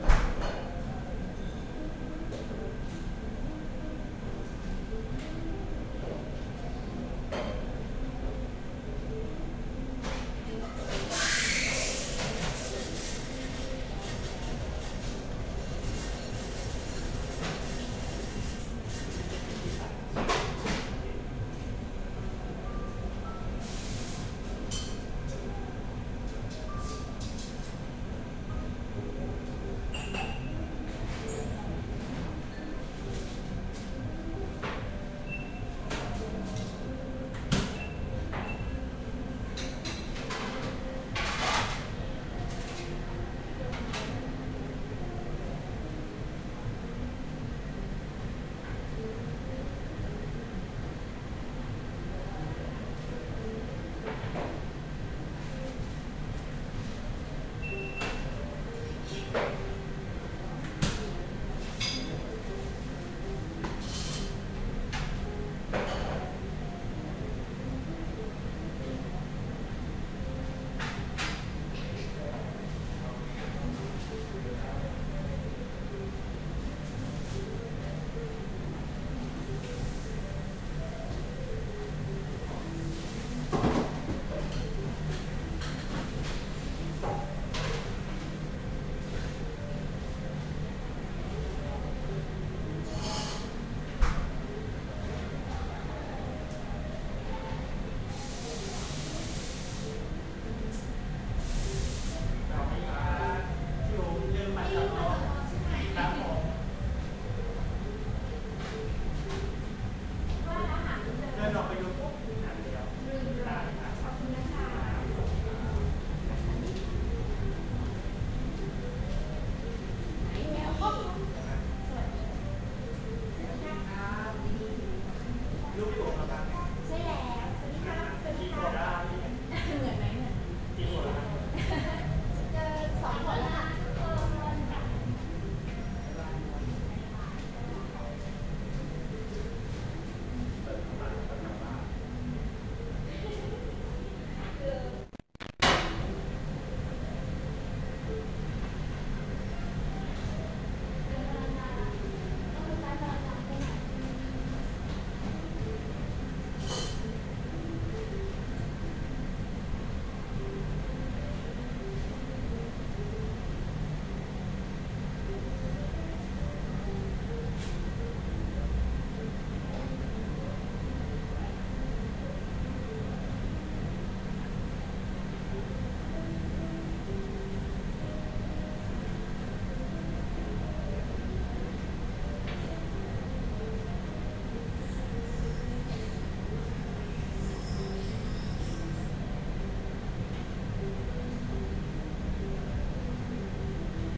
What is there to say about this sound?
The ambiance recorded inside Starbucks coffee around Silom are in Bangkok, Thailand. Not crowded. Soft background music.
Recorded with a cheap omni-directional condenser microphone.